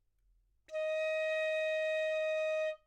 Part of the Good-sounds dataset of monophonic instrumental sounds.
instrument::piccolo
note::D#
octave::5
midi note::63
good-sounds-id::8206
good-sounds, neumann-U87